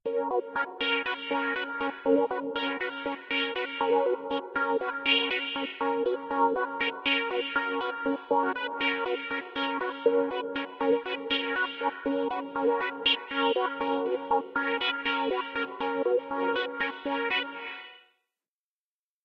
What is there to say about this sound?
Happy synth loop made with a Korg Triton. Delay and reverb added.
happy, keyboard, loop, music, synth